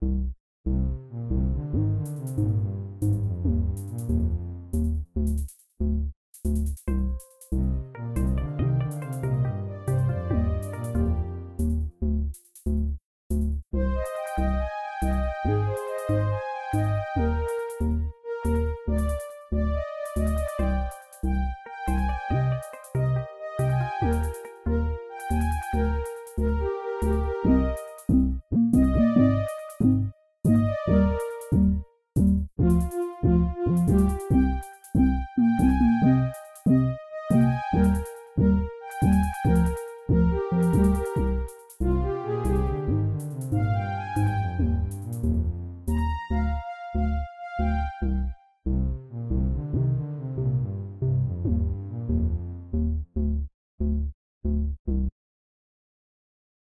Simple tune for background music in a game, for example.
artificial, chiptunes, computer, electronics, fruity, fruityloops, game, games, loops, melancholic, music, short